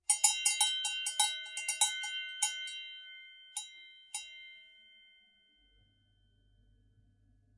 Recorded with my Edirol R-09, with an Austrian cowbell suspended from a length of elasticated cord.
old-fashioned-shop-bell, mechanical-shop-door-bell, old-fashioned-door-bell